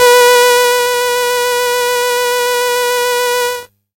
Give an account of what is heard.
Organ C3 VIB
These are the "Instrument" sounding sounds from a broken keyboard. The
name of the file itself explains spot on what is expected.